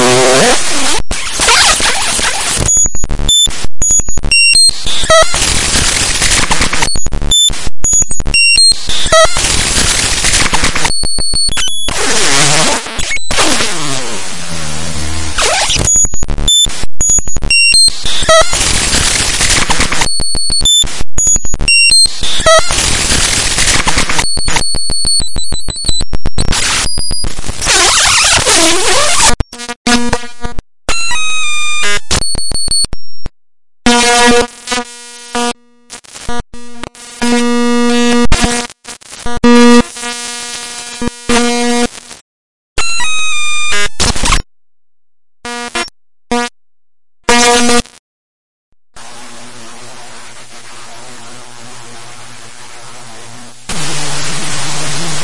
WARNING: LOW FREQUENCIES AND DC OFFSETS GALORE!!
This sound was once a photoshop file.
data, dare-26, raw, harsh